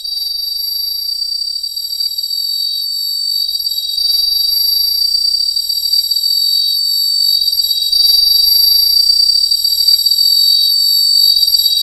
I tried to create the sound that is sometimes generated when the railway wagon's iron wheels do not match the rails in a sharp bend. Took me several minutes with the waveform generator and modulation by means of a tone generator.